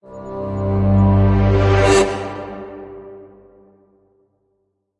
Movie Swell 2
Here another movie FX i made it with strings, trumpet and a horn
Hope you enjoy this sound!
cinema, cinematic, drama, dramatic, film, FX, movie, orchestral, swell, transition